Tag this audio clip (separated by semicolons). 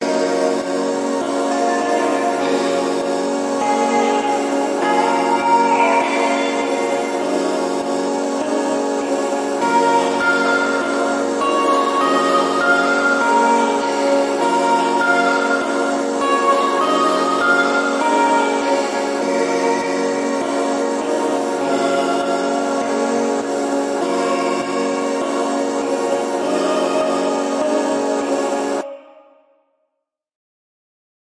synths; angelic; loop; mystical; heavenly; vocals; ethereal; breath